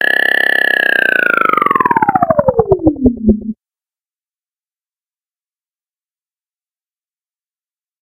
oscillated snippet to produce the sound of an imaginary alien toy...